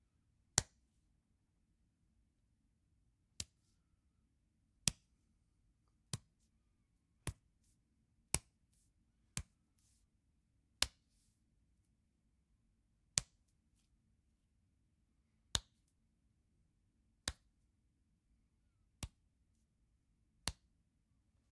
Fist Hitting Hand
A hand being punched by a fist